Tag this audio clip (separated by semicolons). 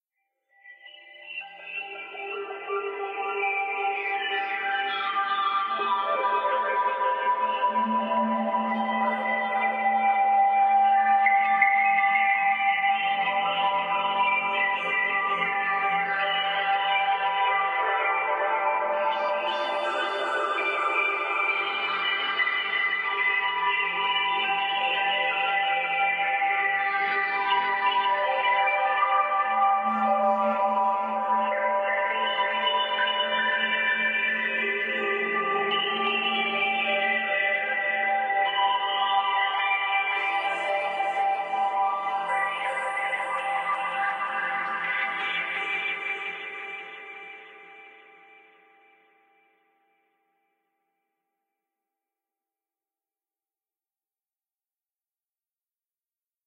ambient; pad